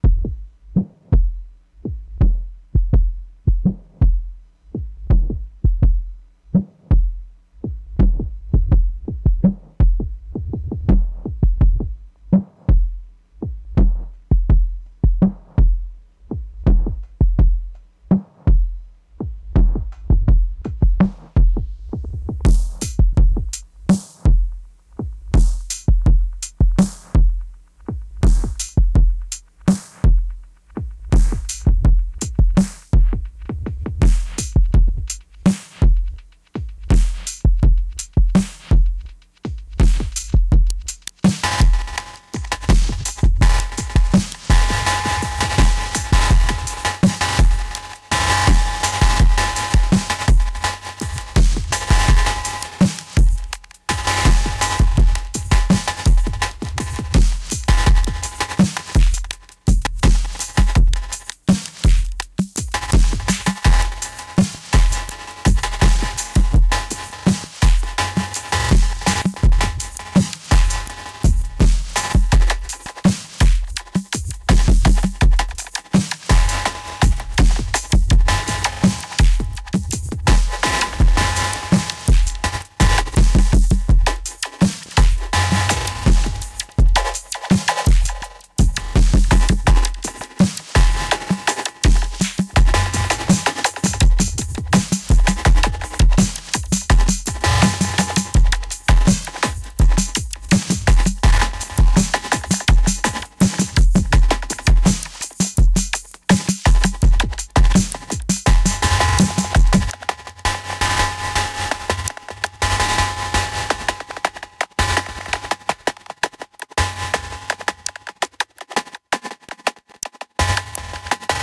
Ambient / Experimental / Chillwave Drum Loop Created with Ableton Live 10
83 BPM
Key of Bm
April 2020
beat, breakbeat, digital, drum, drum-loop, drums, electronic, evolving, glitch, groove, lofi, multisample, noise, percussion, percussive, rhythm, static